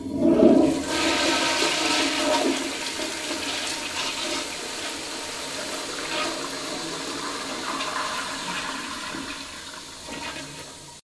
American toilet flushing
toilet-flush, flushing, flush, water, toilet, bathroom